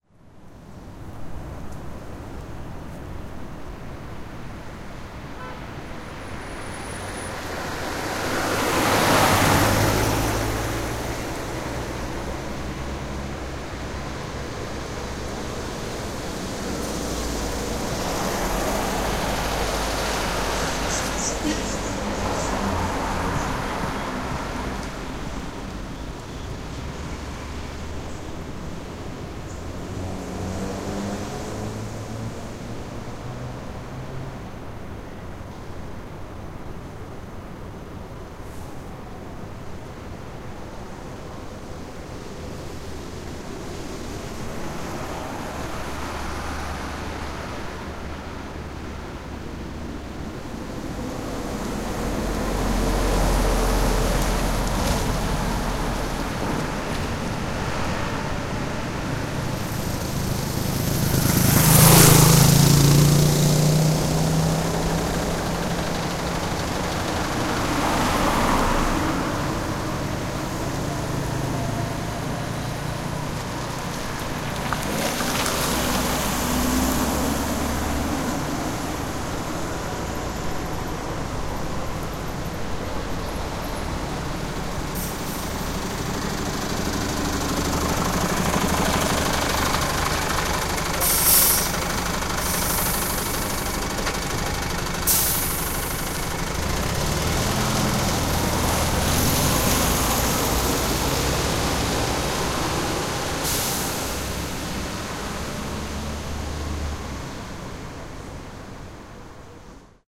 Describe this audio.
Light traffic recorded by TASCAM DR-100 at the corner of a small square, edited in Sound Forge and Adobe Audition
City, field, Street